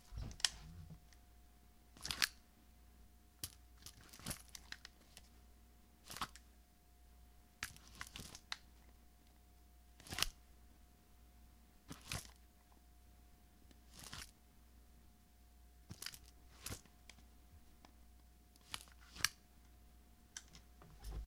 Gun Unholstered-Holstered
A series of sounds of a metal fake gun being holstered and unholstered into a leather shoulder holster
gun, holstered, series, unholstered